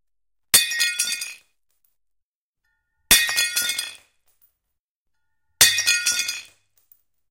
Breaking plate 2
A plate being dropped and breaking on impact.
Recorded with:
Zoom H4n on 90° XY Stereo setup
Zoom H4n op 120° XY Stereo setup
Octava MK-012 ORTF Stereo setup
The recordings are in this order.
breaking, dropping, falling, floor, glass, glasses, ortf, plate, plates, xy